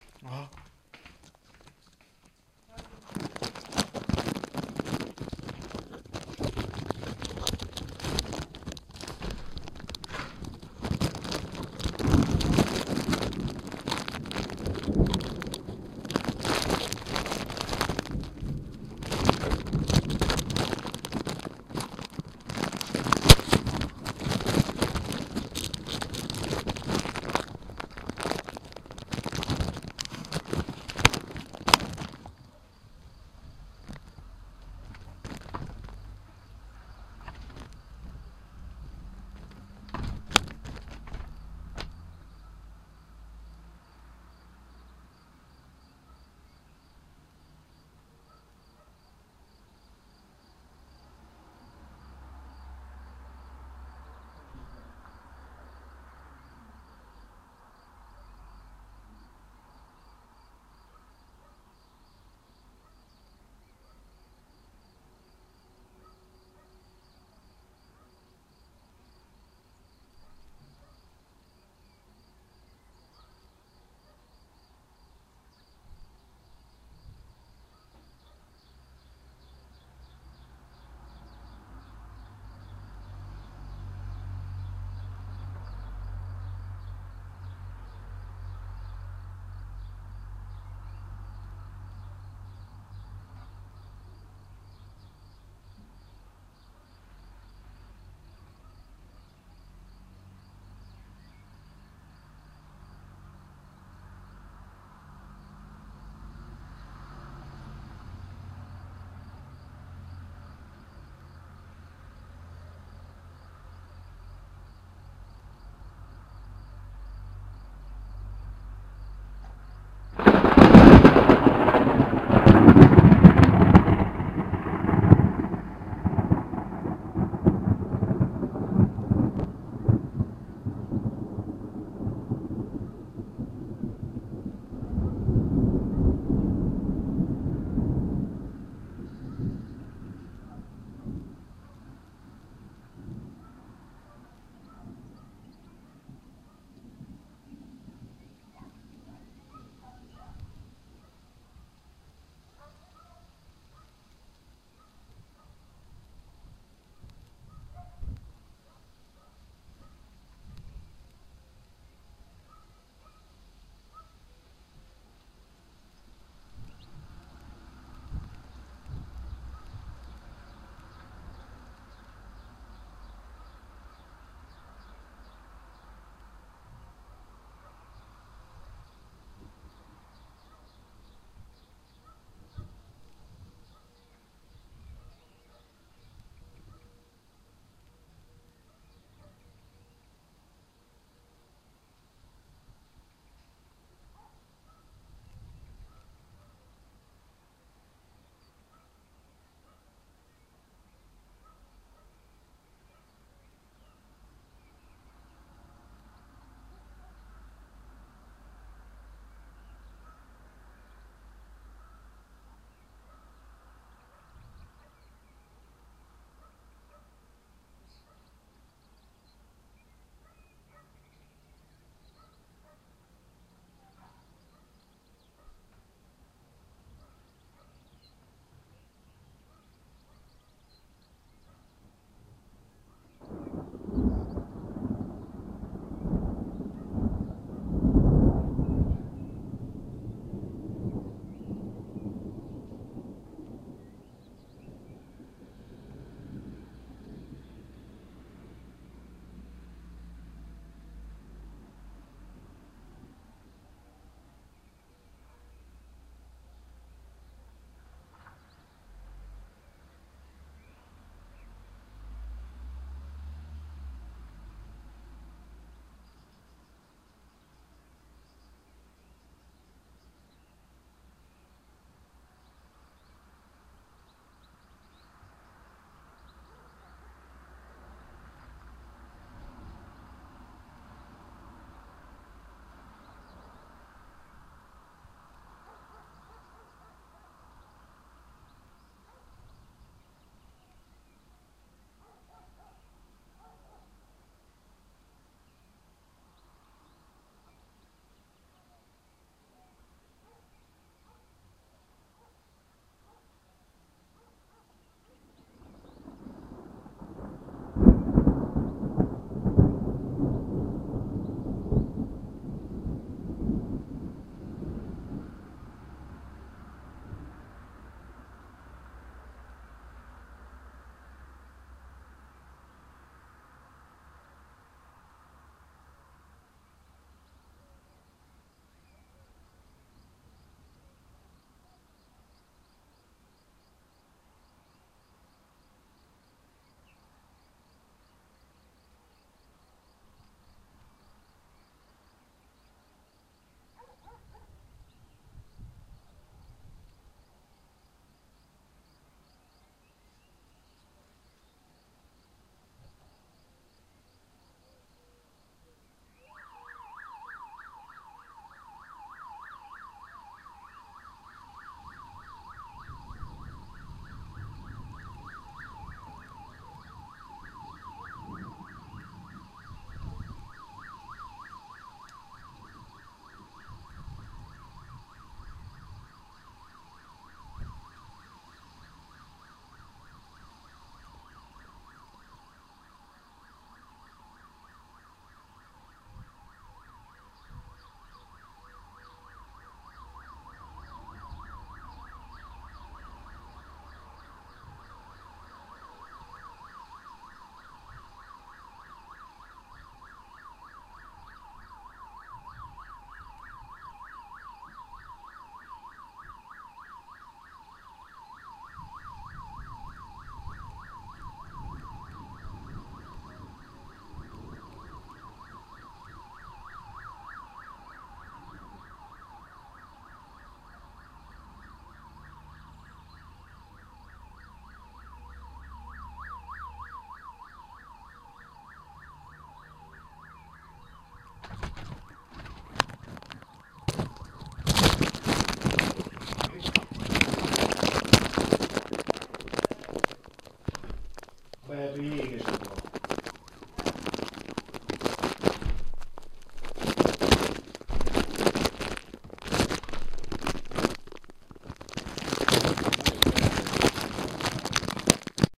I have recorded a thunderstorm in 2 halves as it approached to Pécel. I used my MyAudio MP4 player.